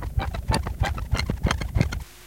fast forward
Fast-forwarding a recording while playing it. Panasonic RQ-A220 player/recorder/radio.
cassette, fast-forward, FF, tape